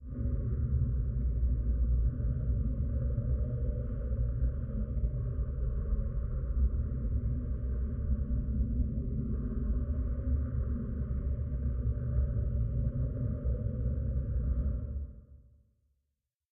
drone sound hole
Room tone for the lower berth in a science fiction movie. Various drones processed in Samplitude.
room, science, fiction, drone, tone